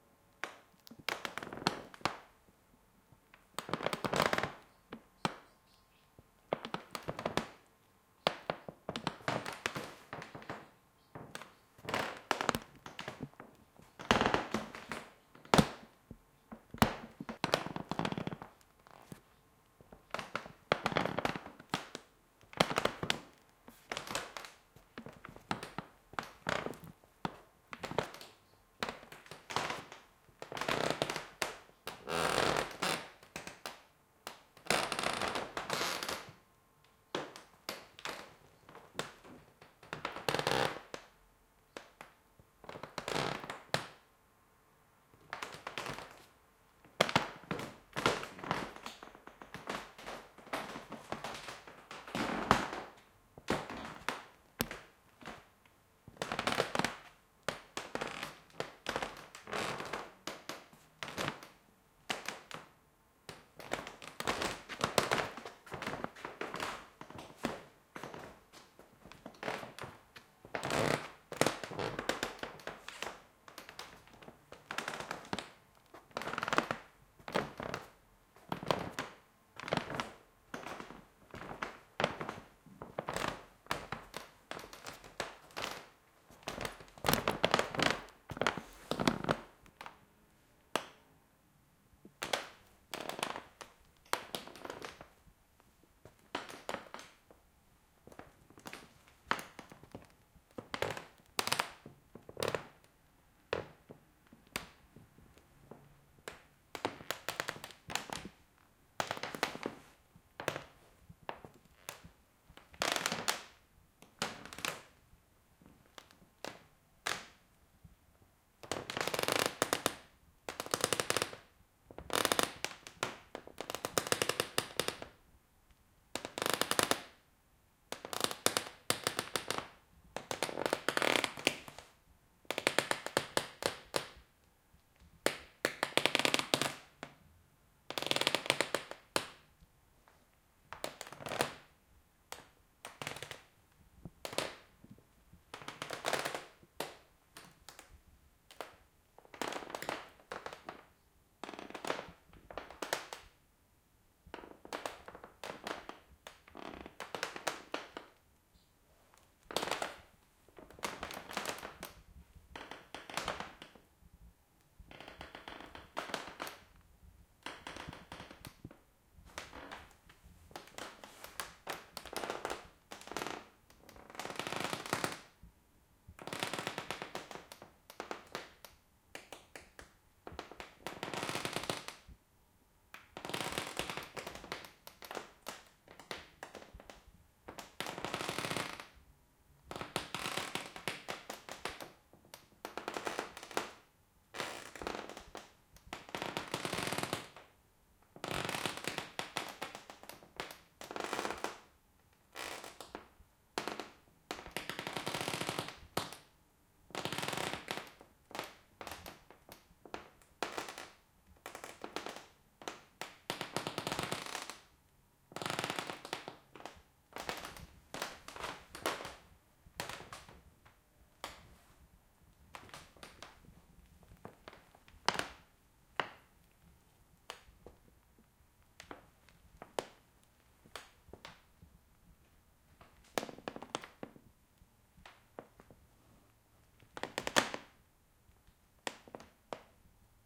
Creaking Wooden Floor
Wooden Creaking on some floor movement. Slow and faster. Best for horror scenes or ambient for a wooden ship.